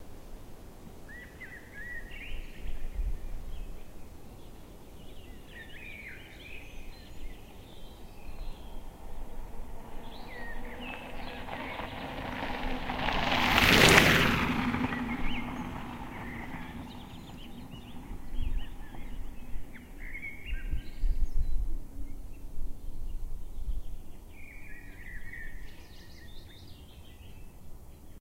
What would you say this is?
Bike passing-by
MTB bike on a forest road passing-by fast. Some birds in background audible.
Recorded with 2x WM-61A capsules plugged into iRiver IFP-790. Little noise reduction in Audigy.
bike, downhill, forest, mtb, passing-by, road